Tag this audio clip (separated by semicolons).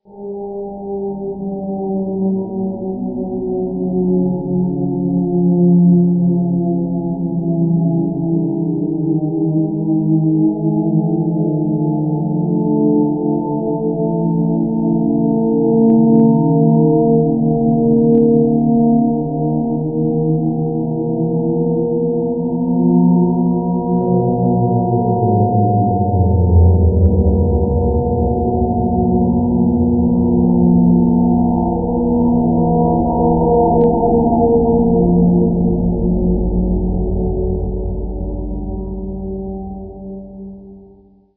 destruction; end; disaster; emergency; the-end-of-the-world; siren; massive-destruction; world; sirens; massive